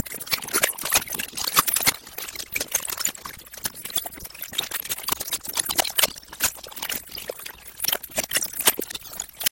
Glitchy tape
Reminds me of either a glitching digital recording or a damaged tape